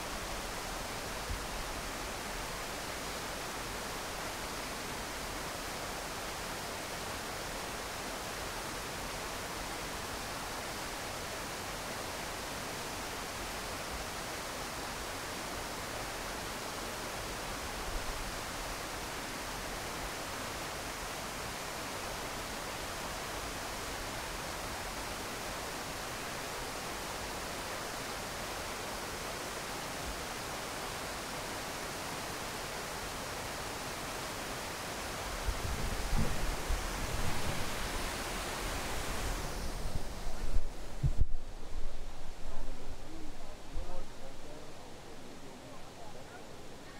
A recording over water flowing over the edge of the lower fall.